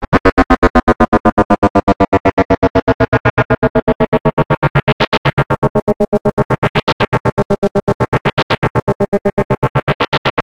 Rhythmically gated, slow, dropping filter sweep with periodic cutoff modulation from a Clavia Nord Modular synth.
fx, slow, sweep, rhythmic, drop, filter, modular, bleep, nord, synth, gated, clavia